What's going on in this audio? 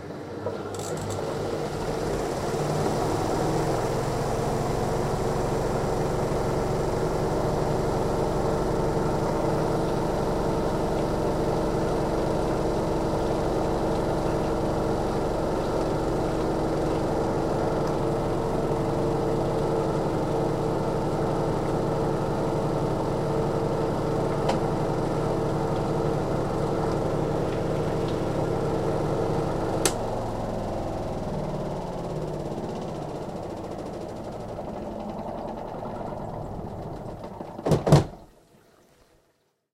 Washing Machine 4 Spin Cycle
mechanical, bathroom, tap, spinning, Room, bath, running, Washing, spin, domestic, kitchen, Machine, drip, drain, dripping, water, wash, Home, drying, sink, faucet